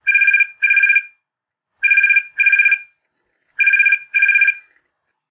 Modern Phone
Modern Lane line phone ring
phone, telephone, ring